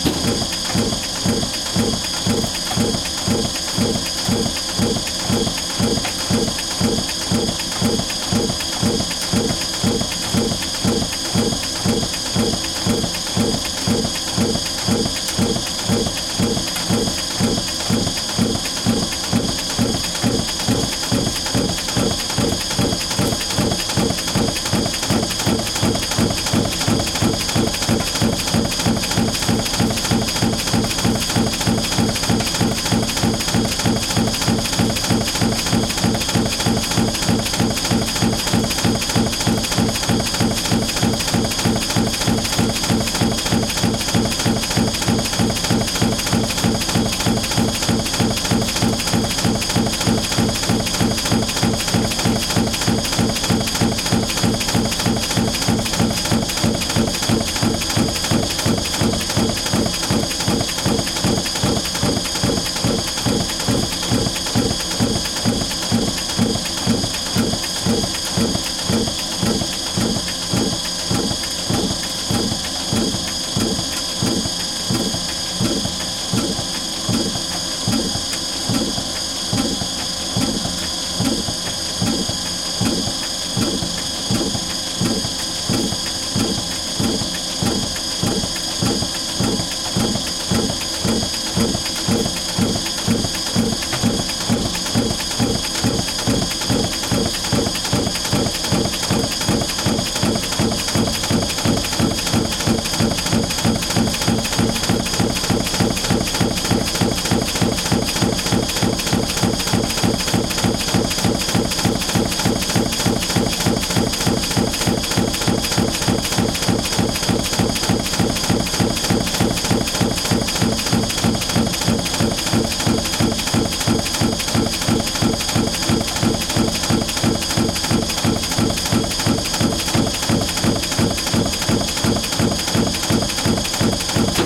A press at work